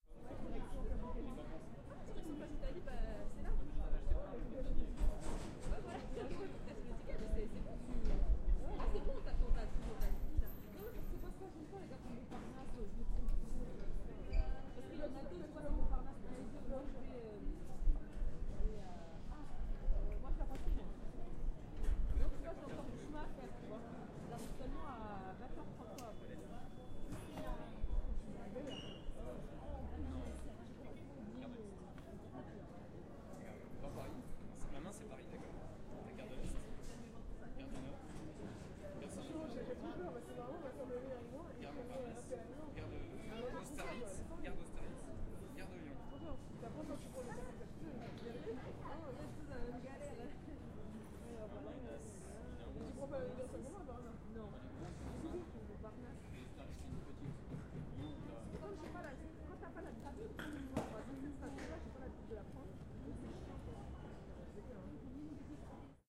train station general ambience
Sample recorded with ZOOM H4 on the platform of Gare de l'Est in Paris.
ambience, field, french, paris, recording, stereo, voice